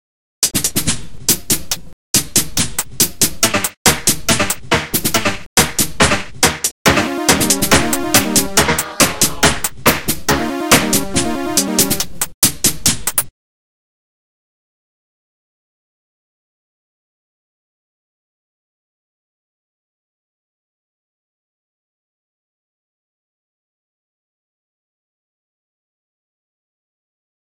Another melody for a indie videogame or something (Give me a second chance)